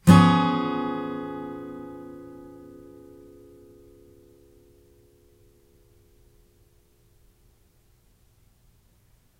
chord Dflat7
Yamaha acoustic through USB microphone to laptop. Chords strummed with a metal pick. File name indicates chord.
acoustic, chord, guitar, strummed